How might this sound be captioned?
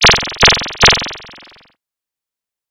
short FM generated tones with a percussive envelope